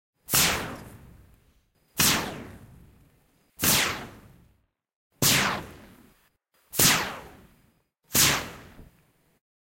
Sci-fi gun shot x6
This sounds were a mix of different recorded with Tascam DR07,
the sounds were achieved from:
--blowing on a sponge
--swiping a finger on the wall
--waving a cardboard for the low sound
--dragging a brush on the wall
--air sounds with pich bend effects and modulation fx
The sounds were mixed and edited in Adobe Audition